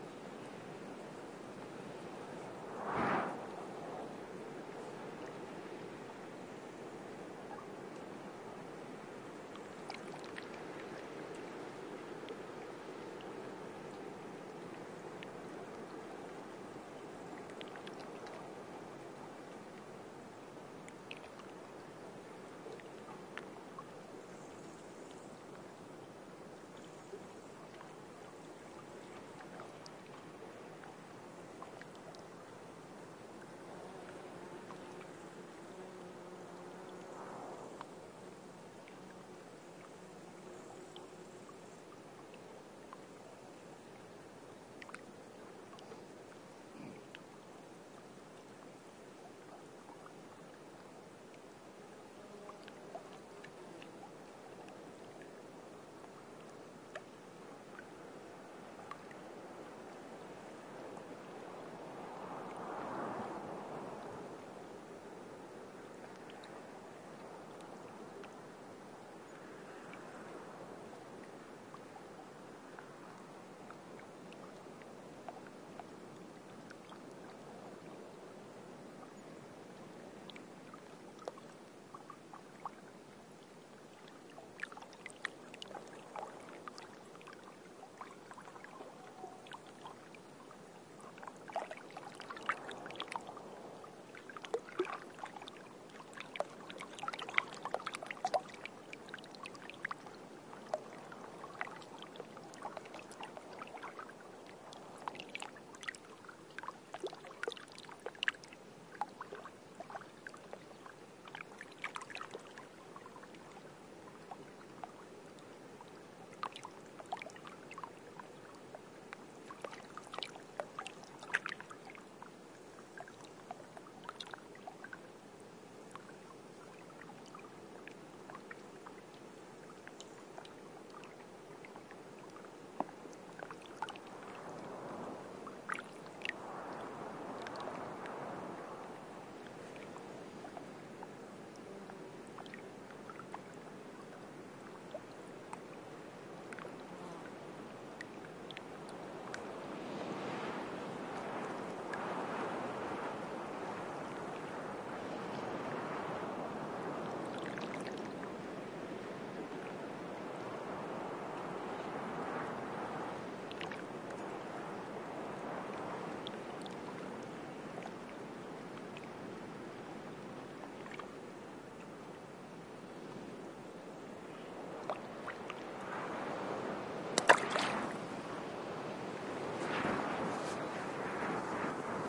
wind gusts and wavelets splashing near a lake in the French Pyrenees. Shure WL183 mics, Fel preamp, PCM M10 recorder. Recorded near Refuge de Pombie, by the Midi d'Ossau masif, in the French Pyrenees